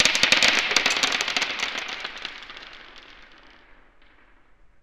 fragments rattle
made by recording emptying a box of usb cables and various computer spares/screws onto the floor then slowing down.. added bit of reverb